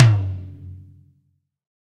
SRBM TOM 001
Drum kit tom-toms sampled and processed. Source was captured with Audio Technica ATM250 through Millennia Media HV-3D preamp and Drawmer compression.